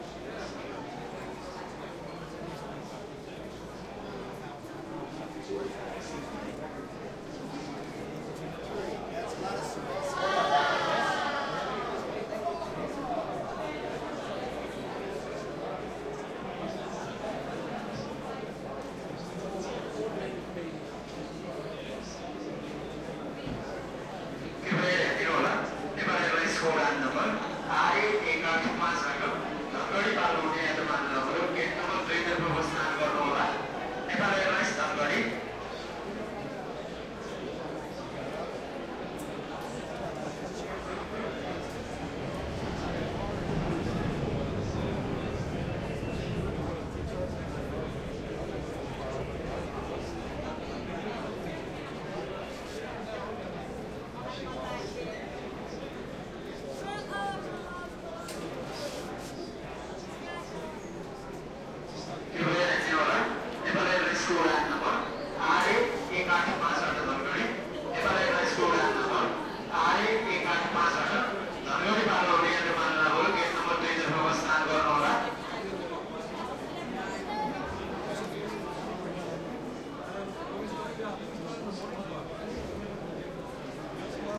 Khatmandu airport lobby
Busy terminal waiting for flights